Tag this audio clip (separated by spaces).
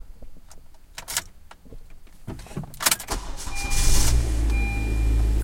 car engine start